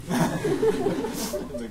group laugh5
A group of people laughing. These are people from my company, who listen story about one of them.
Recorded 2012-09-28.
AB-stereo
funny, human, humor, joke, laugh, people